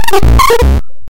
Character Talk Gibberish
This sound was generated in SFXR for your sound developing needs. When coming across a character, but you have no dialogue recorded, you could use this sound as an activation sound.